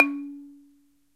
A balafon I recorded on minidisc.
percussive, africa, balafon, wood